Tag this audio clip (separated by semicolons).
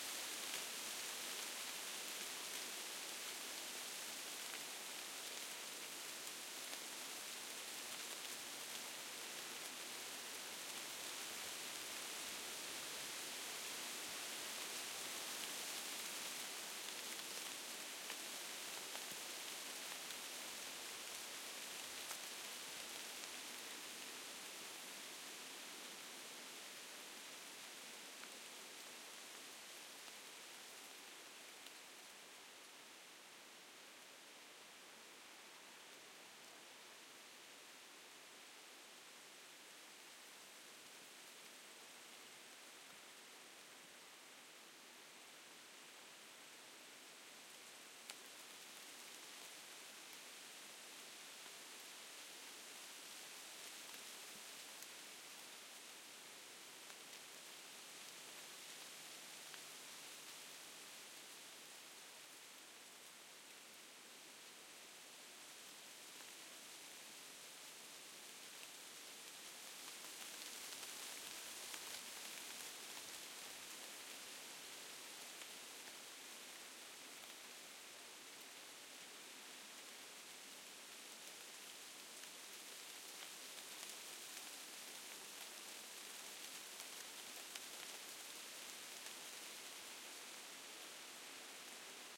through; wind; aspens; leafy